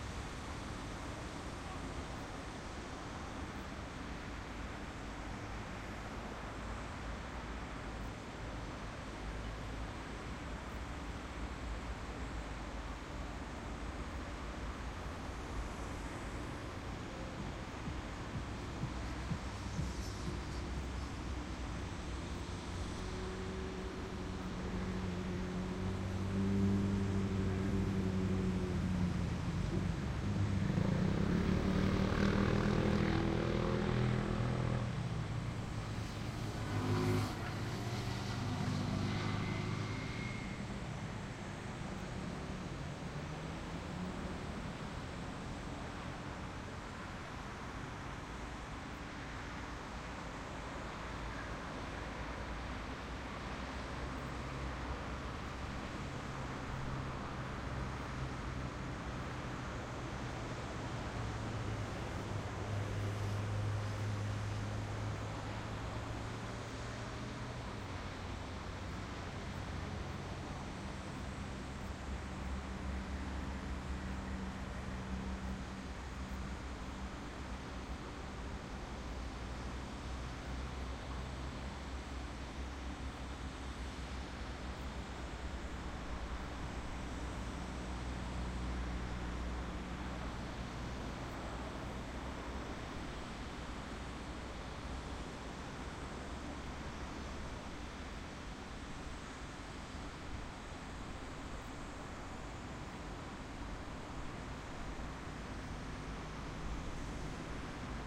Park Kawasaki Lenac Pecine--16
about 10 pm soundscape from park near shipyard
kawasaki, lenac, midnight, park, pecine